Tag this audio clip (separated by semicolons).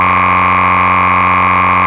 analog; micromoog; moog; noise; oscillator; raw; synth; wave; waveshape